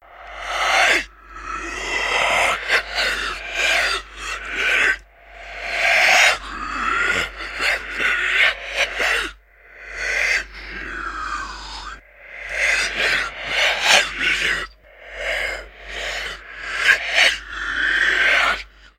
Demon Ghost Speaking 2
Another recording of me speaking gibberish that has been highly altered to sound like a demon "speaking".